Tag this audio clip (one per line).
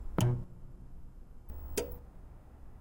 blip
tv
turn-on
turn-off
electronic
television
power-switch
off